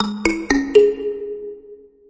A simple jingle that can be used as an announcement sound for stations or airports, inside trains or busses. Made with MuseScore2.
transport, jingle, busses, sound, train, railway, announcement, transportation, station, airport, railroad, stations, public, trains